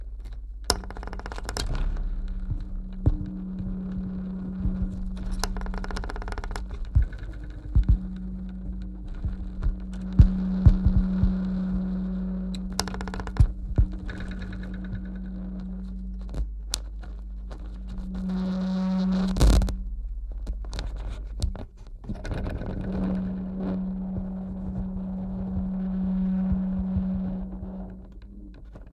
pickups can produce really ugly noises and hums... (but only if you mess it up in a nice way :-)